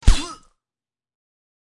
Body Hit 3
A very usefull sound if your actor or stuntman is getting shot, punched, or stabbed. Made by myself, and also have to others. ENJOY